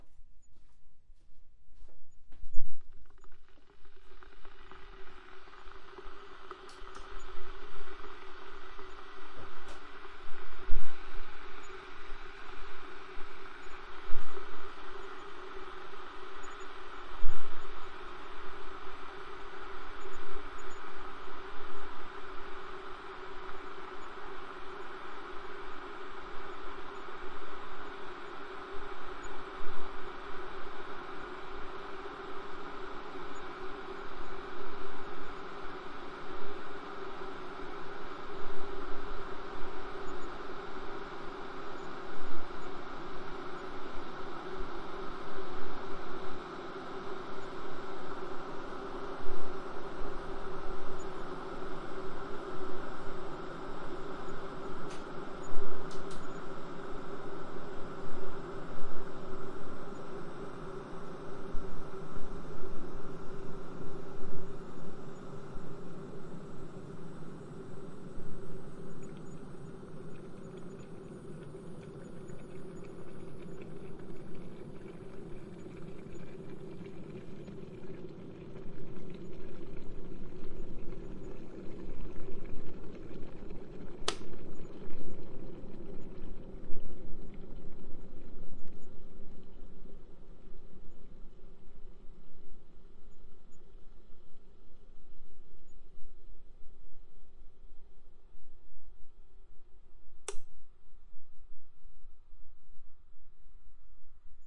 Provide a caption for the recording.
Recording of our kettle boiling. Bit of background noise from me and the kitchen.